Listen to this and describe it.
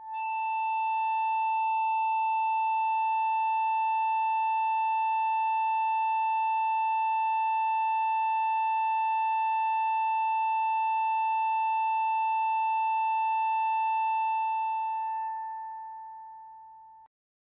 EBow Guitar A5 RS
Sample of a PRS Tremonti guitar being played with an Ebow. An Ebow is a magnetic device that causes a steel string to vibrate by creating two magnetic poles on either side of the string.